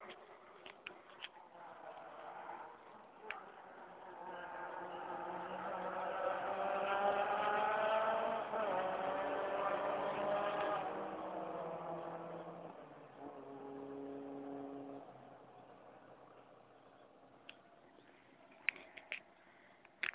Remote competition. Sound of engine about 1 km far. Recorded by Nokia 6230i.